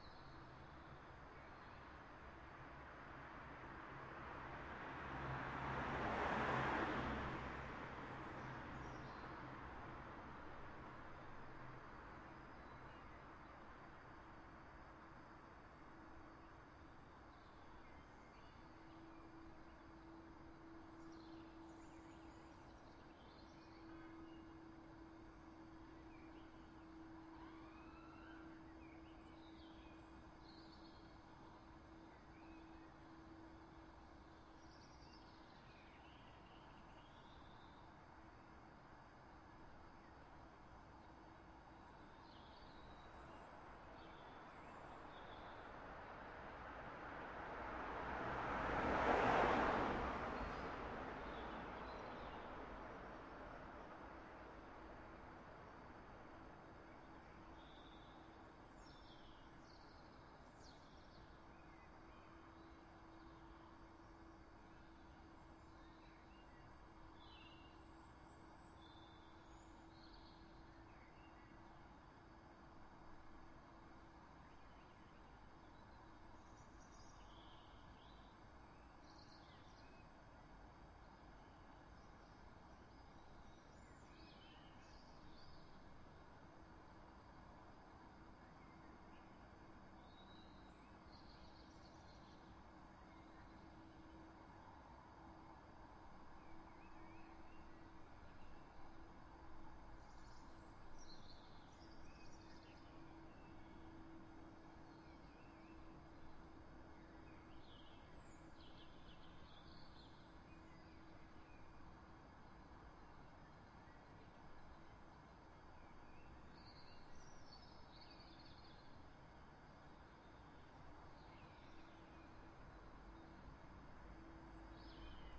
London UK Ambience Feb 2013 12
This is a stereo recording of industrial city ambience in Greenwich, London, UK taken at around 4 in the morning. This recording is unedited, so it will need a bit of spit and polish before use.
night-time, ambience, suburbs, atmos, night, evening, atmosphere, urban, london, uk, greenwich, suburban